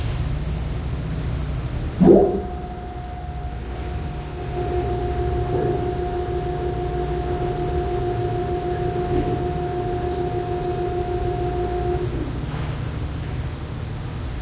A belt driven gearbox running for a few seconds. Some background noise.